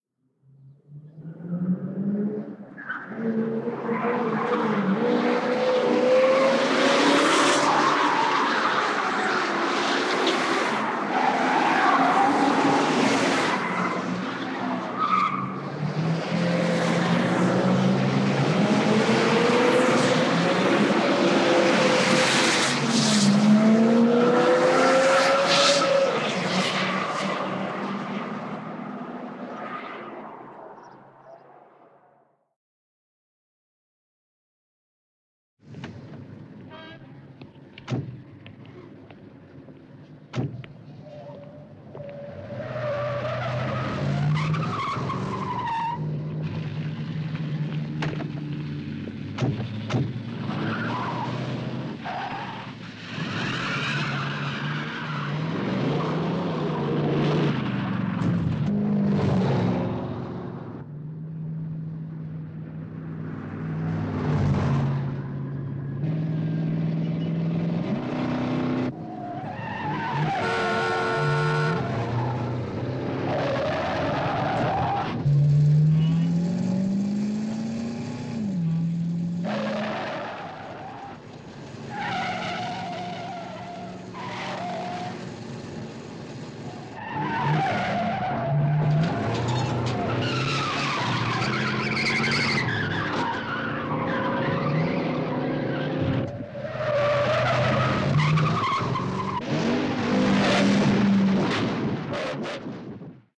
Fast Car Drive
Persecucion en coche,velocidad,frenazos,...
passing-by, drive, fast, car